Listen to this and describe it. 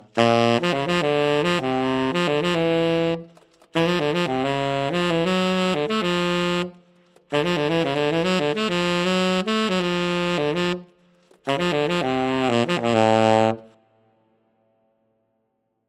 Konk Zooben low tenor saxophone melody with post-processing.